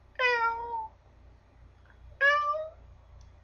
I just pitch up my voice where it's at a comfortable level and not at the highest peak in pitch.
I slowly ease into the vocal sound to avoid any vocal damage.
While I'm easing in there's a very quiet high pitch whistle coming from the back of my throat, too quiet for the microphone.
Sometimes while recording I do get a squeak and a crackle sound that gets picked up, but none of that here.
actor, voice-acting, vocal, acting